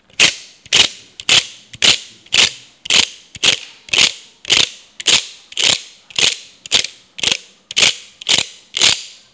mySound JPPT5 Eduardo
Sounds from objects that are beloved to the participant pupils at Colégio João Paulo II school, Braga, Portugal.
pine-cones, Portugal, Joao-Paulo-II, Eduardo